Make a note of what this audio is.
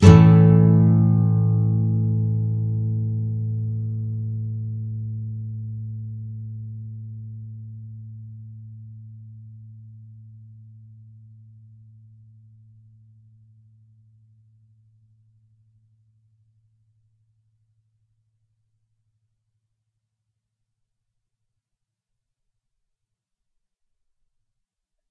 A Bar up
Standard open A Major Bar chord (E Major formation). Up strum. If any of these samples have any errors or faults, please tell me. P.S. Get your mind out of the gutter.
acoustic, bar-chords, chords, guitar, nylon-guitar